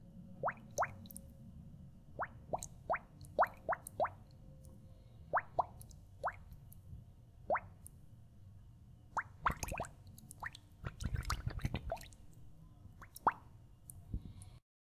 running water bubbles-03
many bubbles made with air-filled bottle
under water of a sink
this one is not continuous, sounds more bottling
recorded with sony MD recorder and stereo microphone
bubbles, running